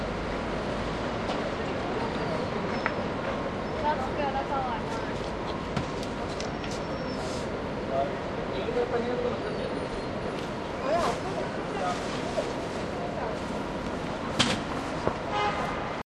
At a hot dog stand in New York City recorded with DS-40 and edited in Wavosaur.